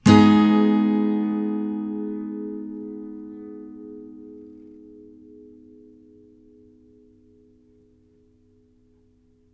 The next series of acoustic guitar chords recorded with B1 mic through UB802 mixer no processing into cool edit 96. File name indicates chord played.
a, acoustic, chord, clean, flat, guitar